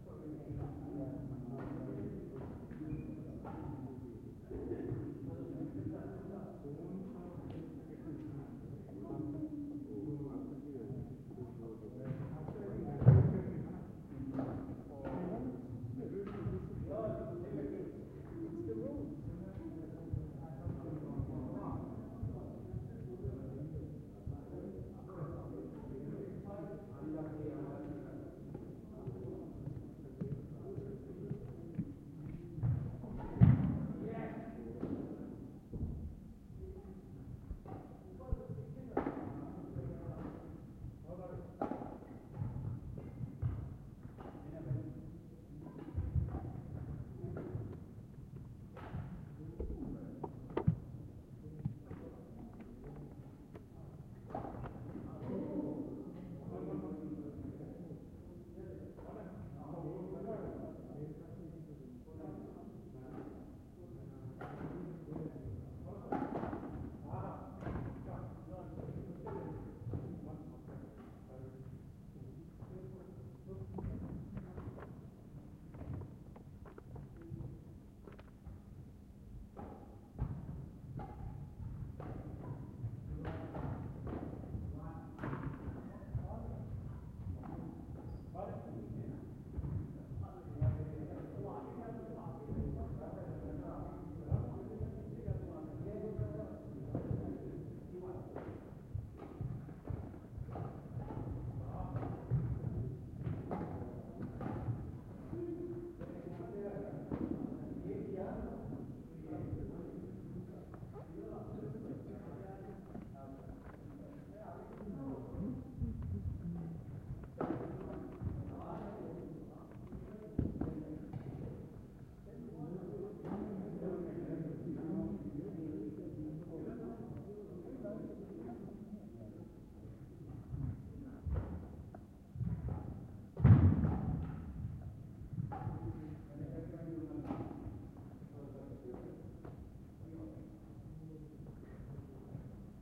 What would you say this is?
People playing badmington indoors in the local sports centre.
game, shouting, gym